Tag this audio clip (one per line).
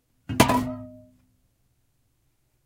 falls
crash
soda-can